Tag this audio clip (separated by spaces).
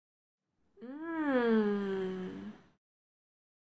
sonido final mmmm